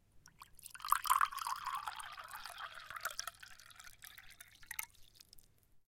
Pouring water into a glass. Recorded with a Zoom H1.
bottle, drink, fill, glass, liquid, pour, pouring, water